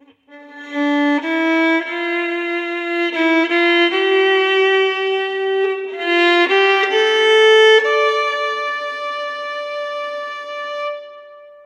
A sad violin lick
acoustic, sad, violin